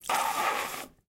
Nice long water spitting effect usefull for dislike the drink or laughing while water in your mouth.
Thank you for the effort.
spitting, spit